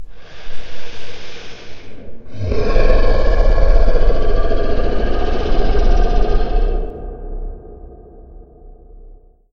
Cthulhu growl
Made in Audacity by doing a cheesy "Grr" into the mic
Send me a link to your project too! I love seeing how stuff gets used!
Cthulhu, snarl, fantasy, creatures, beast, growling, animal, voice, dinosaur, moan, groan, undead, growls, beasts, vocalization, demon, evil, demonic, dragon, roar, growl, horror, creepy, creature, zombie, monster, daemon, scary